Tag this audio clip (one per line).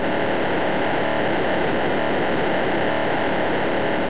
burst
engine
noise
scanner